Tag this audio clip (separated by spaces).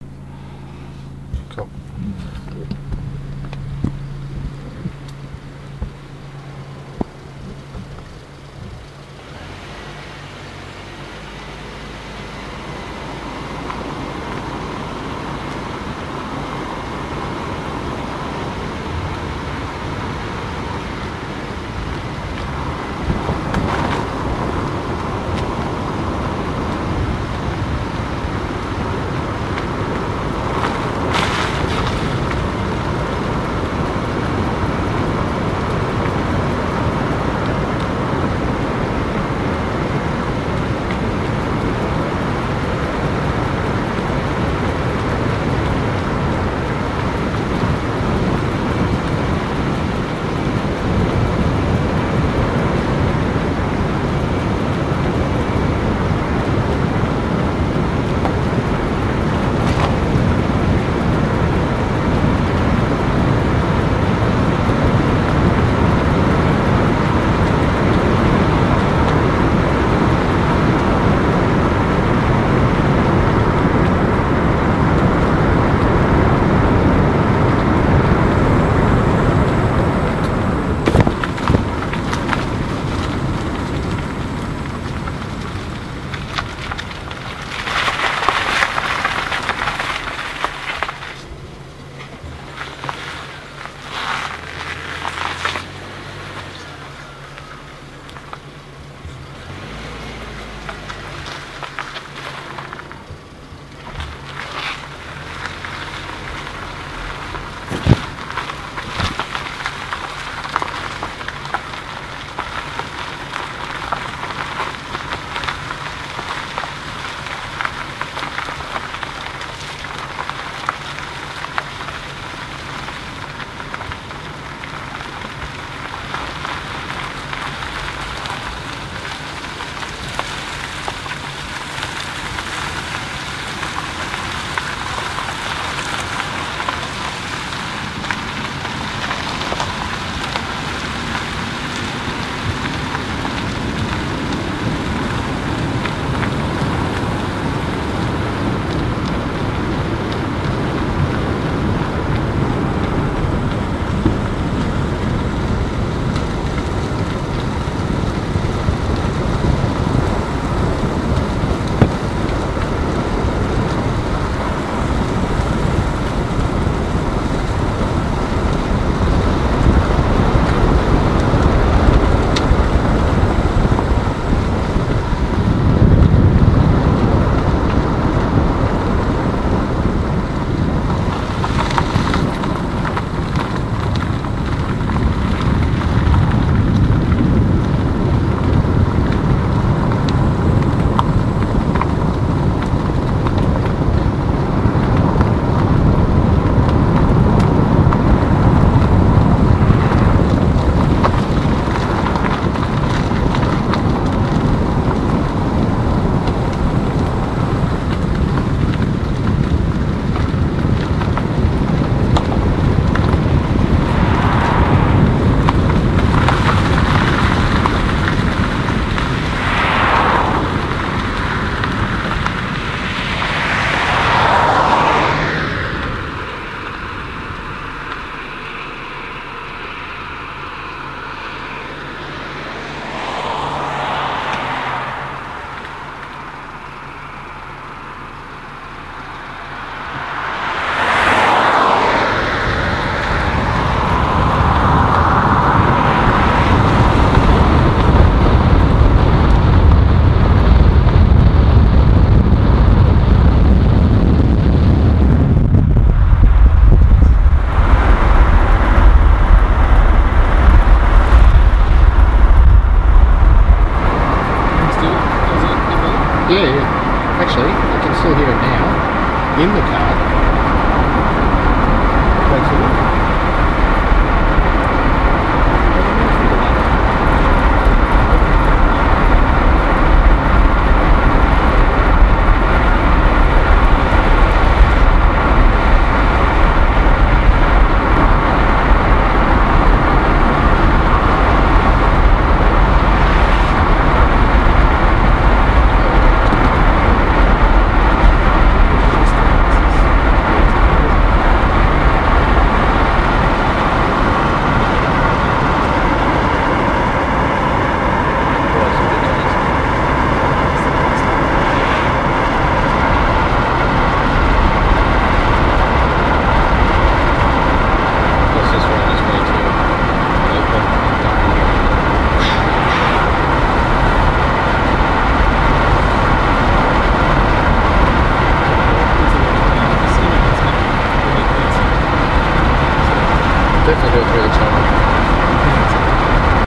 car driving gravel road wind